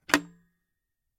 old bakelite telephone lift handset
The sound of an old bakelite telephone, the handset is lifted.
Recorded with the Fostex FR2-LE and the Rode NTG-3.